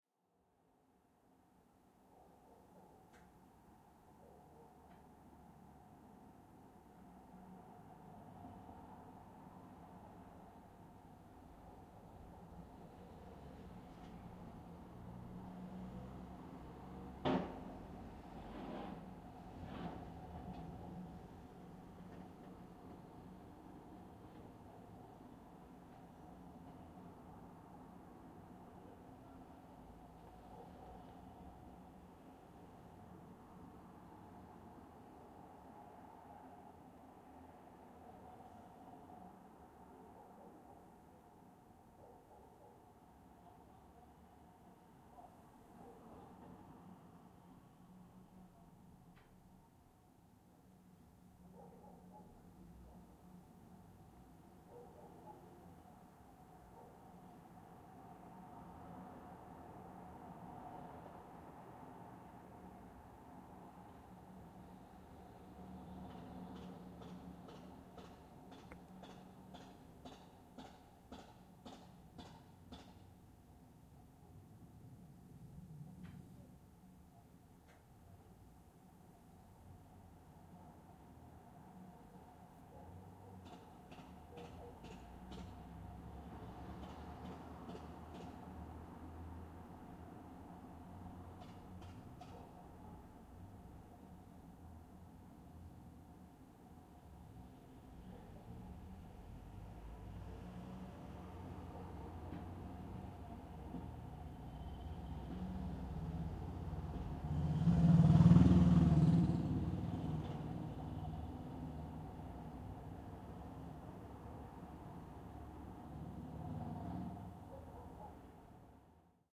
Room tone night street dog barking far
Room tone, Interior of a room in the night time, cars passing slow, dogs barking, some noises in the street of metal objects, bins moving, motorbike passing.
Recorded with a Zoom H6.
Cars, Passing, Room-tone, Street